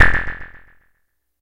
alien phaser.R
sounds like a phaser noise, or something an alien would use.